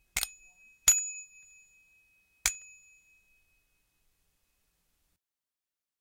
small bicycle bell ringing
recordist Peter Brucker / 4/21/2019 / shotgun microphone / created by flicking foley door knob
bell, bike, ding